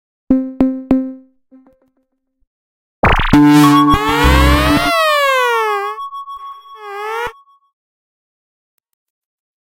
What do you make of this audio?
20140316 attackloop 120BPM 4 4 Analog 1 Kit ConstructionKit WeirdEffectsGuitarRigBreaksEffect2
This loop is an element form the mixdown sample proposals 20140316_attackloop_120BPM_4/4_Analog_1_Kit_ConstructionKit_mixdown1 and 20140316_attackloop_120BPM_4/4_Analog_1_Kit_ConstructionKit_mixdown2. It is a weird electronid effects loog which was created with the Waldorf Attack VST Drum Synth. The kit used was Analog 1 Kit and the loop was created using Cubase 7.5. Various processing tools were used to create some variations as walle as mastering using iZotope Ozone 5.
120BPM, ConstructionKit, dance, electro, electronic, loop, rhythmic, sci-fi, weird